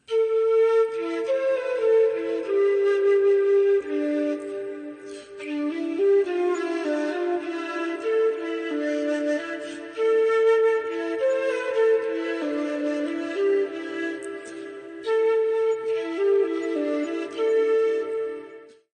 Small phrase
Myself plying a small melody with flute and adding some fx (banaan-electrique)
fx, monophonic, a-min, reverb, flute, banaan-electrique